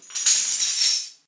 Digging Coins #1
Might be useful for situations when digging around broken pieces of glass, metallic coins or small objects.
coins, glass, metal, broken, shards, clash, pieces, chain, agaxly